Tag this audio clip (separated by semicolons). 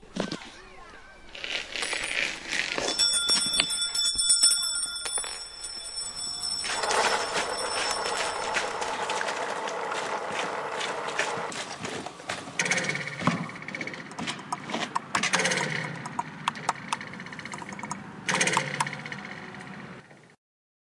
Switzerland; TCR; soundscape